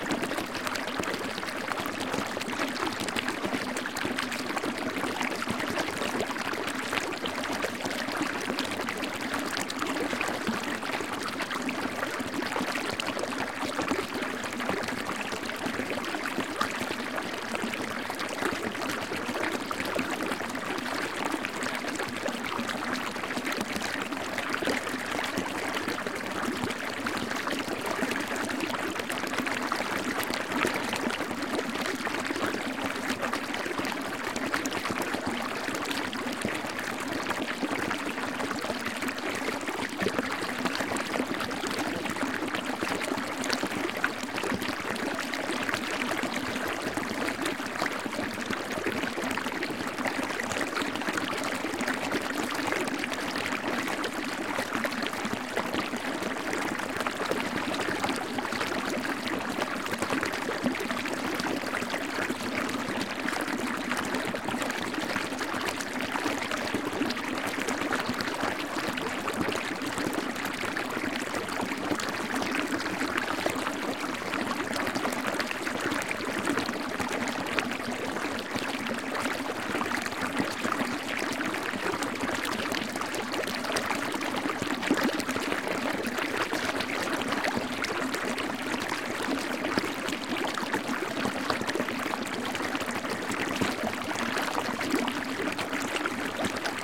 national water 07
One in a series of recordings of a small stream that flows into the Colorado River somewhere deep in the Grand Canyon. This series is all the same stream but recorded in various places where the sound was different and interesting.
field-recording, river, relaxing, dribble, flow, trickle, loop, noise, stream, relaxation, water, ambient